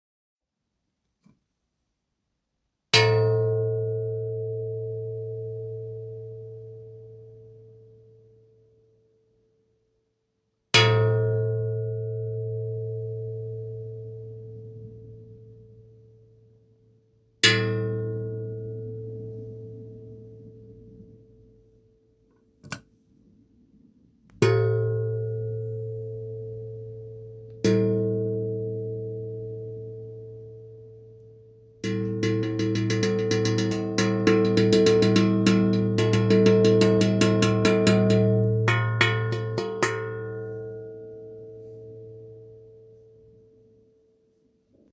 Hitting metal tray
bell, Clang, metal, metallic